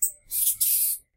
Angry rat. 2013.09 Zoom H1
Rat, angry, chu, t